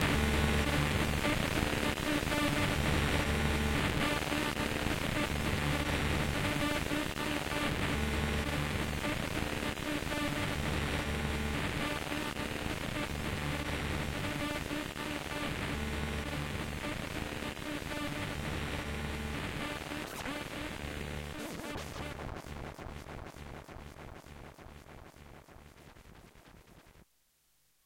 Machine Malfunction 03
Rhythmical malfunctioning alien machine
Glitch, Machine, Science-Fiction, Alien-Technology, Malfunction, Sci-Fi